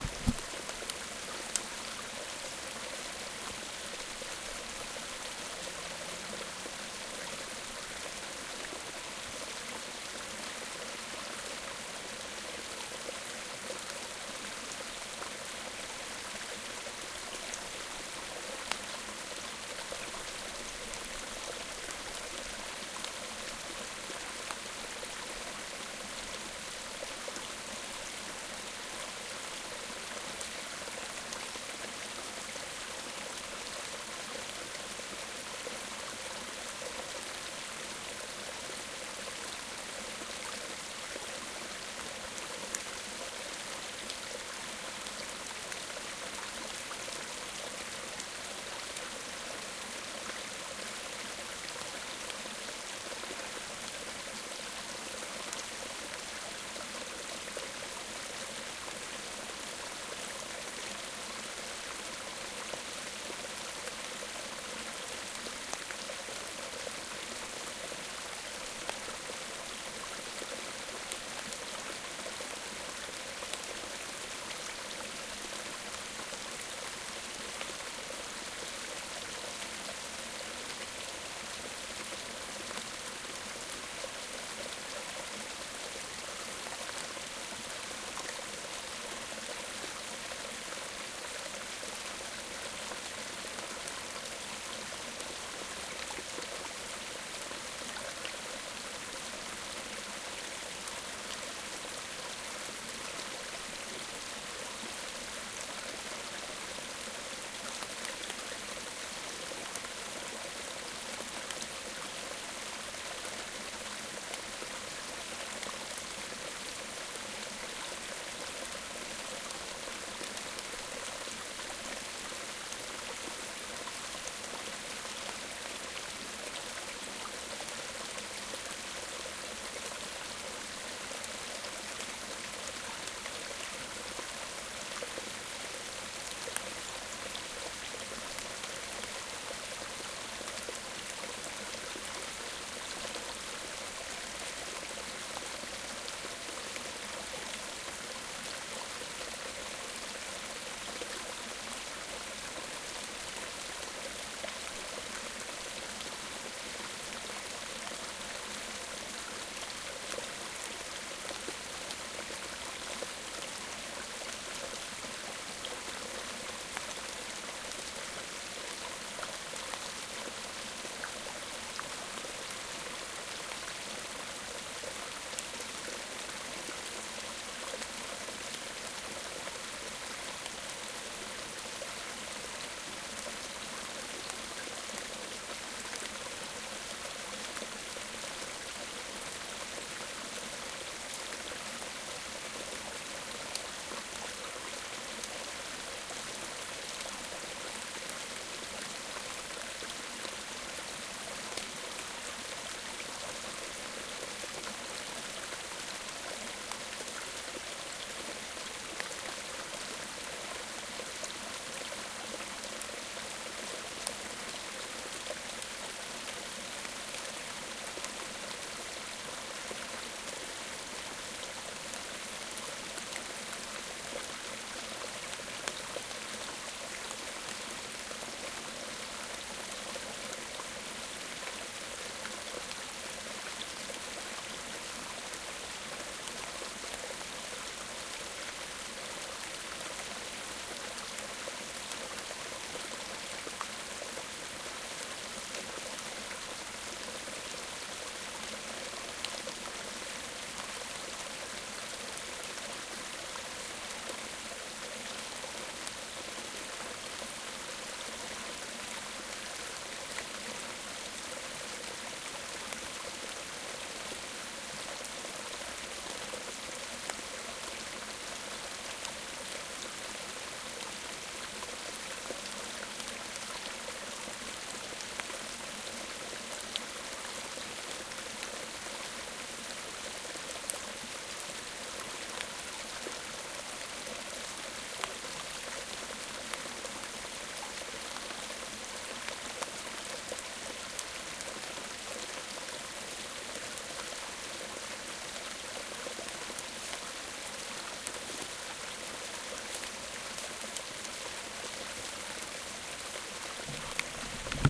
the sound of medium stream in the winter forest - front